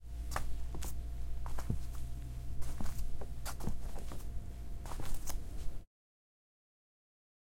footsteps on soft floor bip
Bare feet walking on a soft, padded floor
bare, floor, footsteps, padded, soft, walking